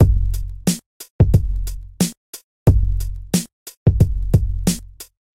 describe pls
fairly deep and dry downtempo or hip-hop Drum loop created by me, Number at end indicates tempo
beat, downtempo, drum, drumloop, hip-hop, loop